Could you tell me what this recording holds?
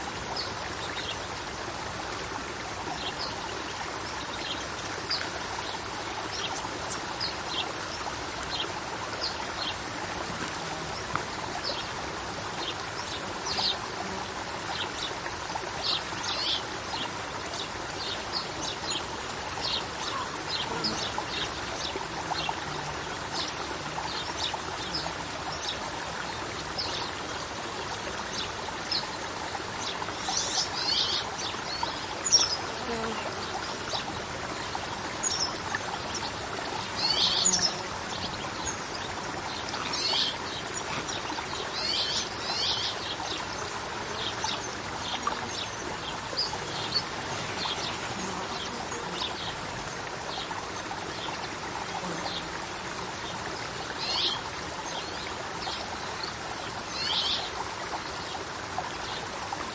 Babbling stream at medium distance, so insects and birds can also be heard. EM172 Matched Stereo Pair (Clippy XLR, by FEL Communications Ltd) into Sound Devices Mixpre-3. Recorded near Vallespinoso de Aguilar, Palencia Province, N Spain
stream, water, river, field-recording, nature, birds